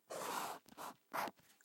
Felt tip pen drawing arrow 02
Drawing an arrow with an Artline 204 FAXBLAC 0.4 fineline pen. Recorded using an AKG Blue Line se300b/ck93 mic.
arrow, felt-tip, drawing